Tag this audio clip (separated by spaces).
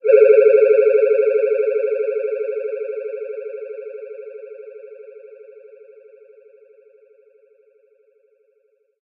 alien alien-artifact ambient drone effect oneshot sci-fi space synth vst